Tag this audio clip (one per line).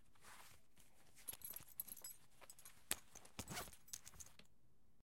waist
pants
unzipping
off
belt
unbuttoning
taking
undoing